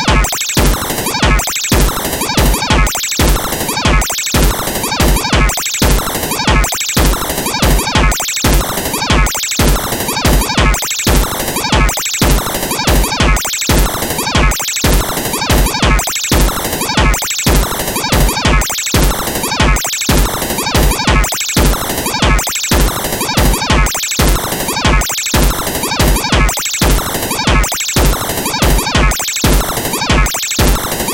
VCV Rack patch